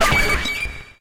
STAB 094 mastered 16 bit
Electronic percussion created with Metaphysical Function from Native Instruments within Cubase SX. Mastering done within Wavelab using Elemental Audio and TC plugins. A weird spacy short electronic effect for synthetic soundsculpturing. Mostly high frequencies.
electronic, percussion, stab